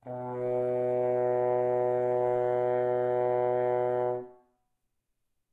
horn tone B2
A sustained B3 played at a medium volume on the horn. May be useful to build background chords. Recorded with a Zoom h4n placed about a metre behind the bell.
b, b2, french-horn, horn, note, tone